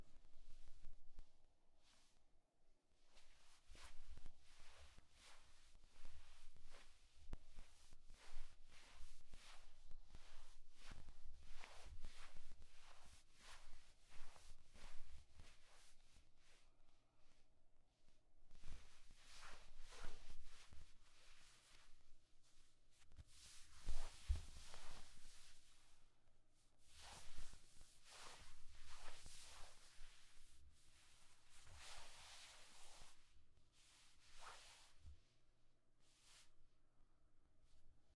clothes movement foley
clothes, foley, movement